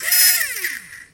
The sound of a broken toy helicopter trying its best.